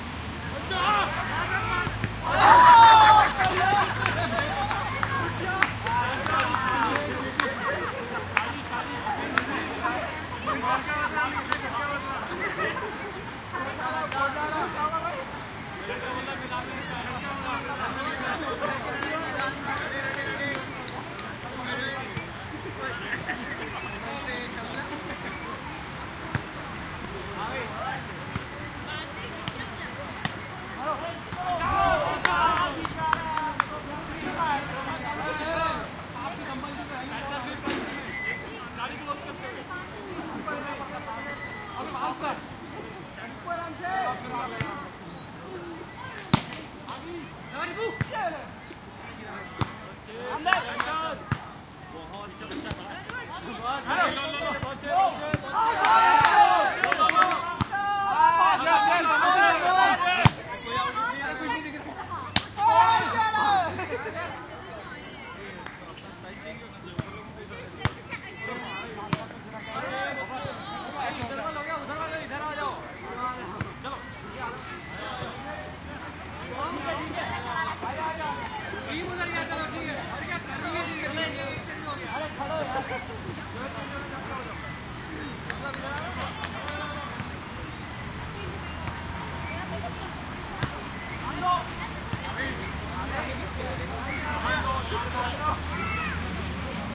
volleyball game in park with squeaky swings south asian voices Montreal, Canada
Montreal, Canada, park, game